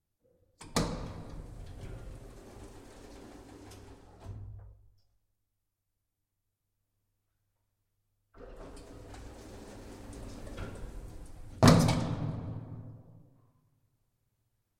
elevator doors open close
The sound of opening and closing elevator doors.
Recorded with the Fostex FR-2LE and the Oktava MK012 microphone.